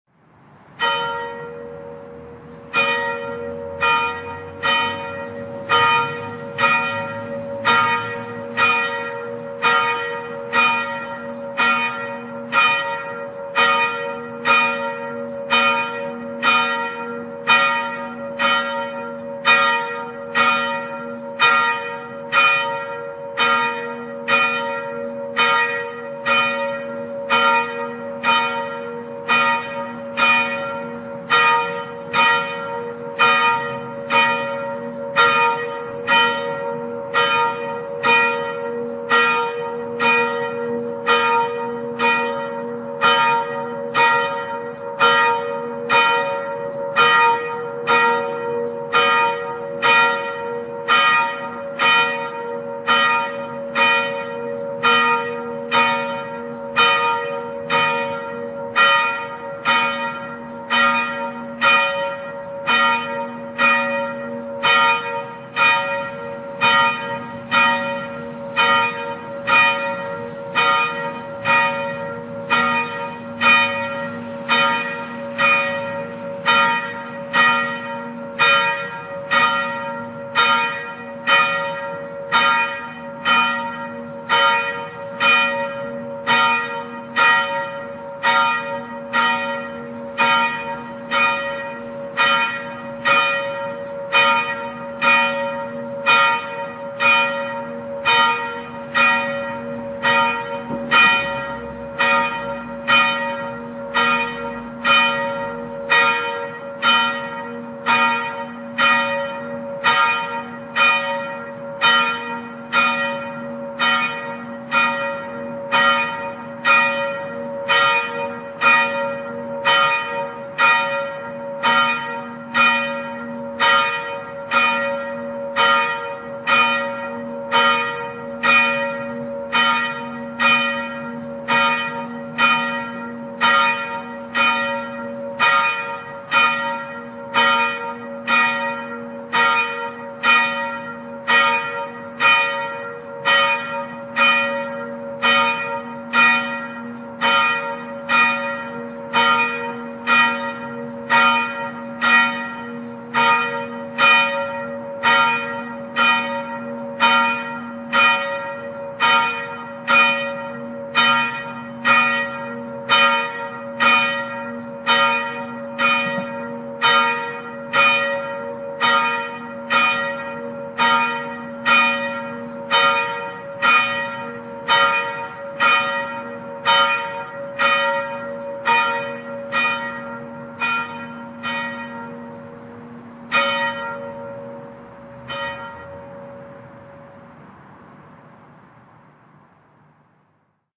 church bell from church's tower
bell, church, ding, dong, tower